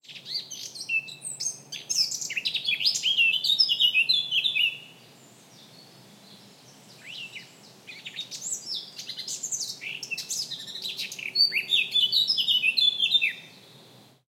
birds chirping in a forest
field-recording, bird, birdsong, birds, forest-birds, bird-chirp, forest, nature, bird-chirping